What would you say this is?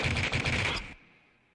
Our editor Joe Beuerlein created this gun burst. This is the stereo version.